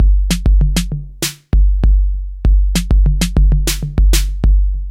DM 98 808 stp
Vintage drum machine patterns
DrumLoop, Electro, Drums, Drum, IDM, Electronic, Trap, Machine, Vintage